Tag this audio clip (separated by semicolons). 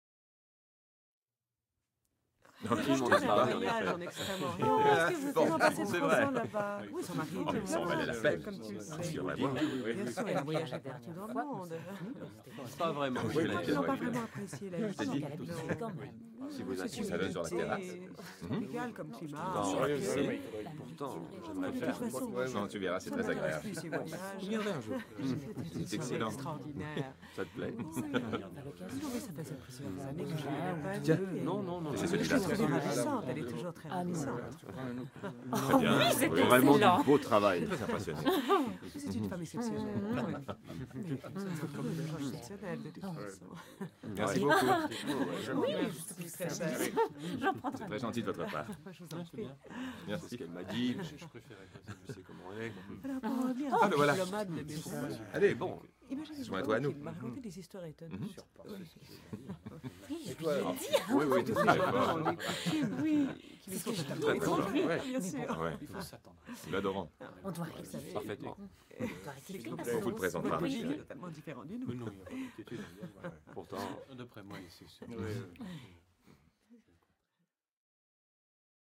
ambience; dialogue; french; restaurant; vocal; walla